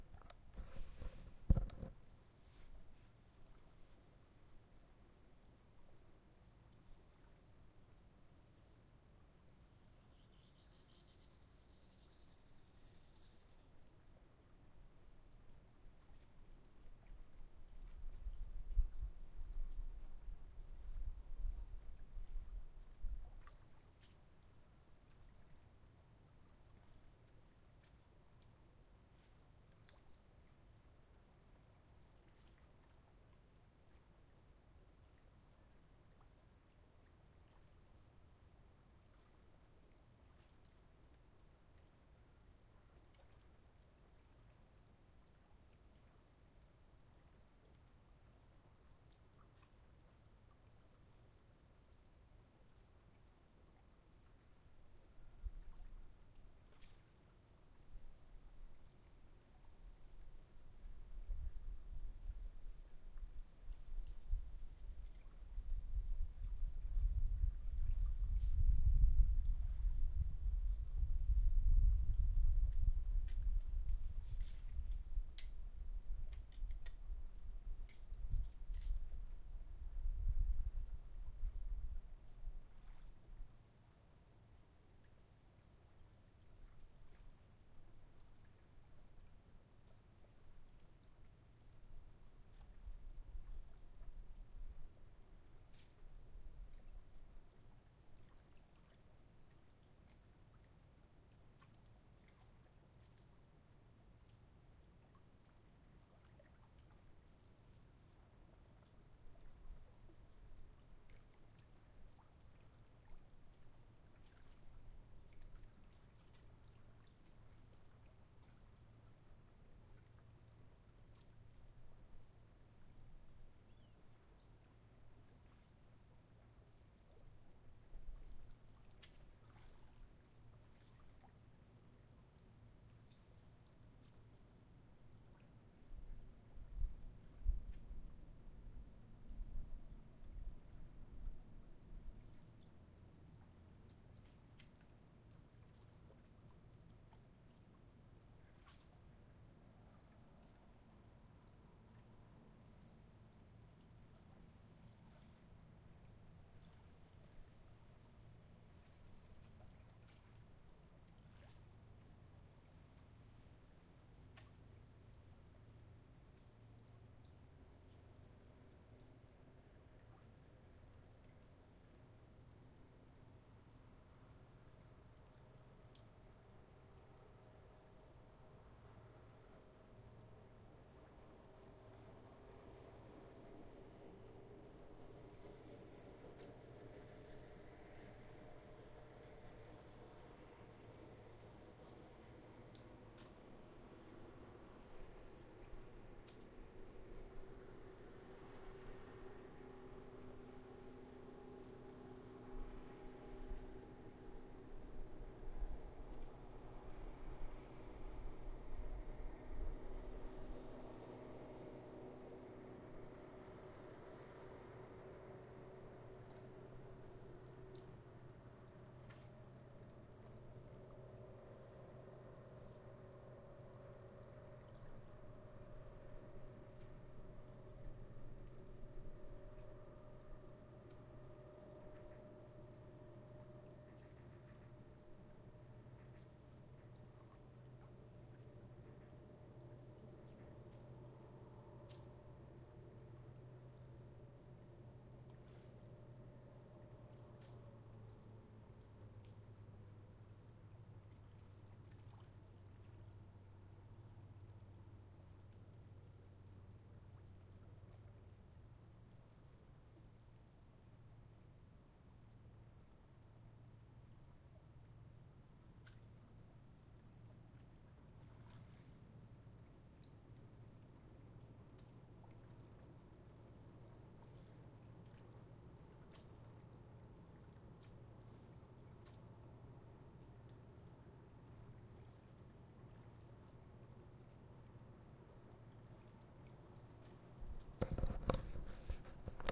Very quiet air recorded in a remote location in the San Juan Islands. Using the zoom H2.